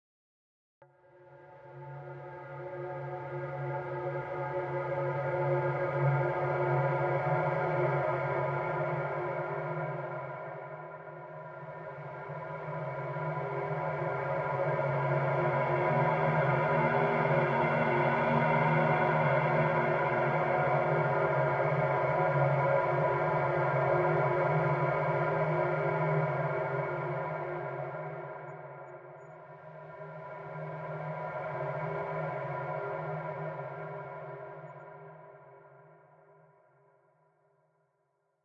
Toned Dark Wind
Synthetic Pad that works well for dungeon or horror crawlers